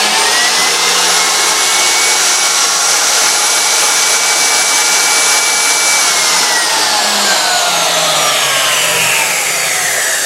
Circular Saw 3
Sound of a circular saw in operation.